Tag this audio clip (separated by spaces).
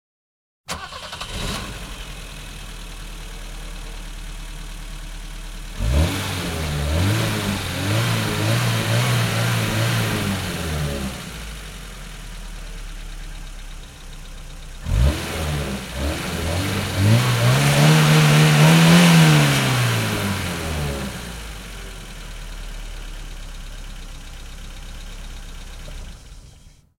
car engine gas